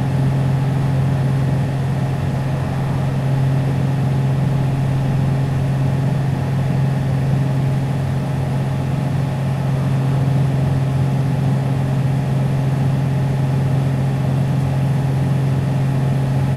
dryer-vent trimmed normal
ambient, field-recording, city, vent, mechanical